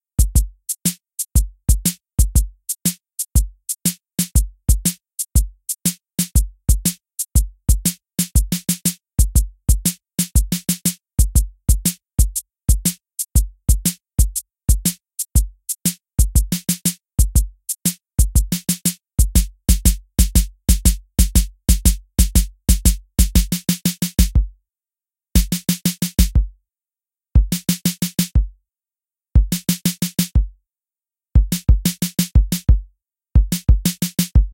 Blues beat 808 drum sound tempo 120
Here's a basic blues using an 808 drum sound with effects add.